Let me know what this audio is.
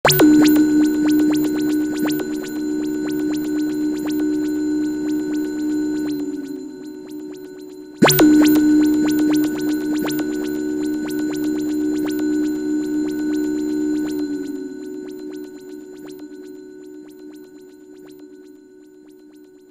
Bell sound with bubbly background. Made on a Waldorf Q rack